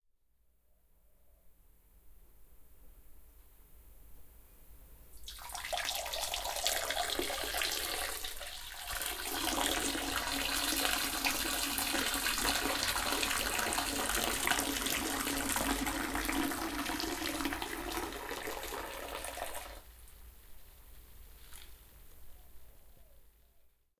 Piss Toilet
Short urination in ceramic toilet. Zoom H4N Handy Portable Digital Recorder